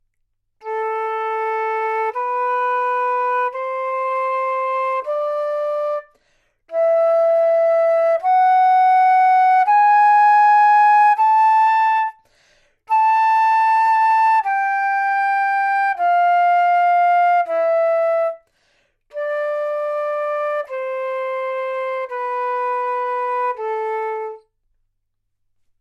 Flute - A natural minor
Part of the Good-sounds dataset of monophonic instrumental sounds.
instrument::flute
note::A
good-sounds-id::6930
mode::natural minor